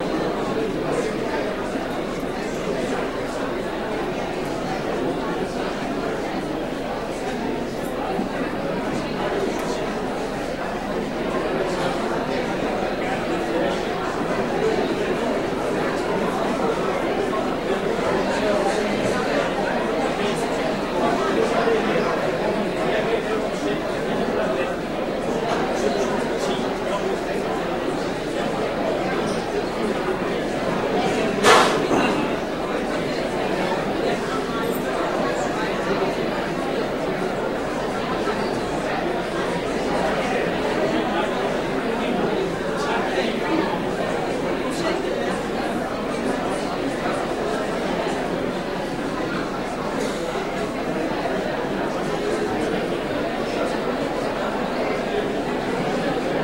Large group of people chattering and moving about. Sound is in mono.
conference, venue-noise